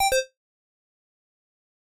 Simple Chiptune Jingle 2
A simple notification/jingle sound made with a synth plugin.